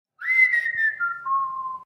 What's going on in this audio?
Whistle Project 1
I did some whistling and effected the speed, pitch, and filters in a few ways